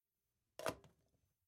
Telefono cuelga 2
Llamar, timbre